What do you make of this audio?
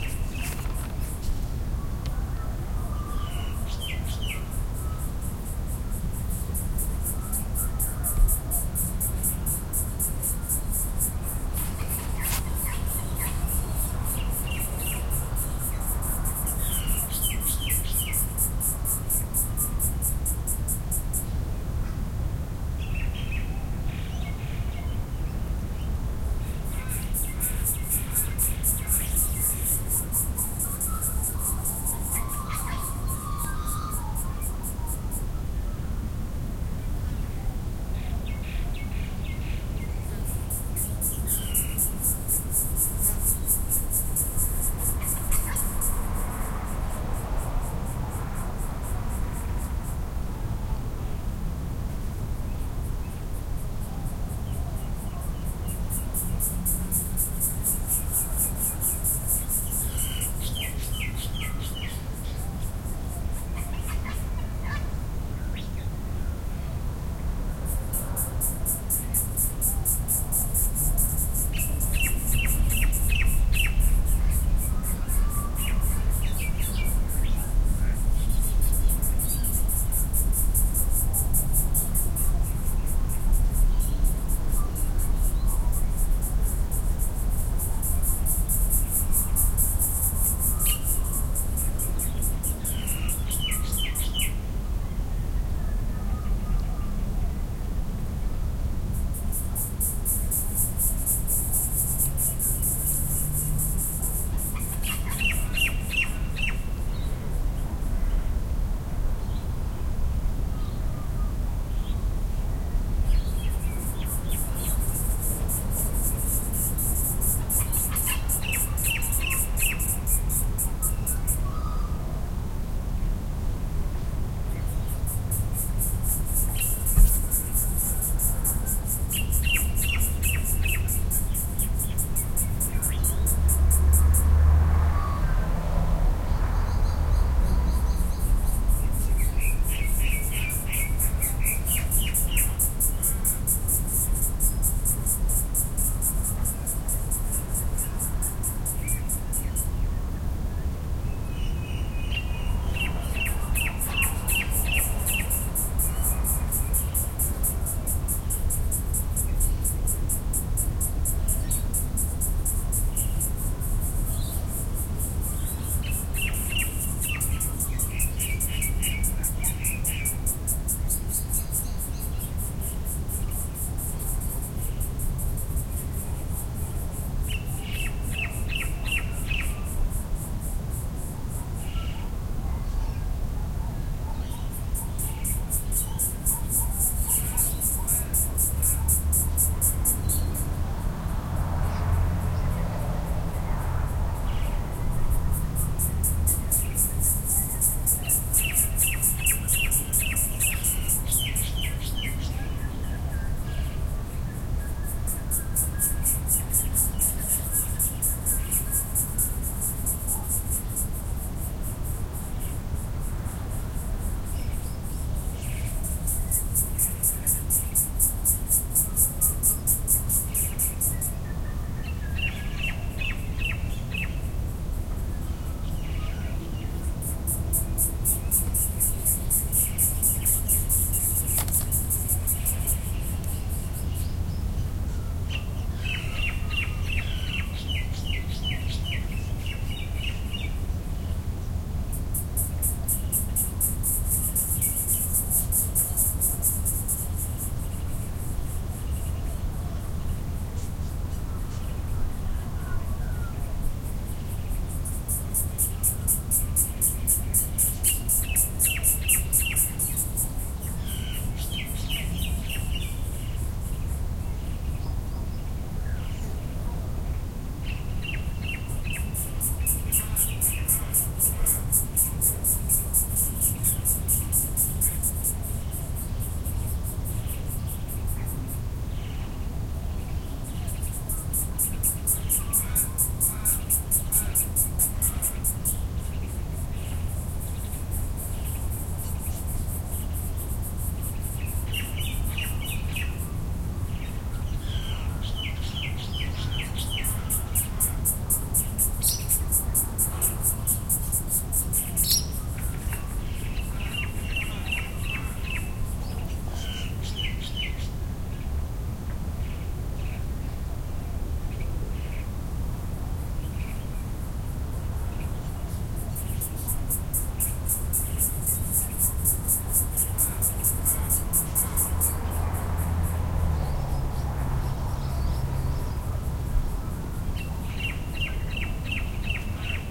Newport Lakes, summer morning
A summer morning field recording at Newport Lakes Reserve, a former bluestone quarry and rubbish tip in the Western Melbourne suburb of Newport. The area is now a vast suburban park with a native nursery and twin human-made lakes, popular with many species of birds and people.
This recording was made using a Sony PCM-M10 recorder's built-in omnidirectional stereo microphones.
It was taken on January 15 2011 at 8.30am, on unceded Boon Wurrung land, part of the Eastern Kulin nations. I acknowledge the sovereignty of the traditional owners and pay my respects to their elders and all First Nations people.
ambiance, ambience, atmos, australia, birds, boon-wurrung, field-recording, insects, melbourne, naarm, nature, newport, newport-lakes